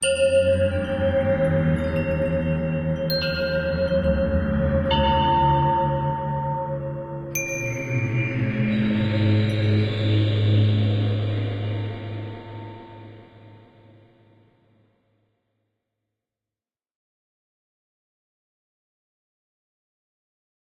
Tweaked percussion and cymbal sounds combined with synths and effects.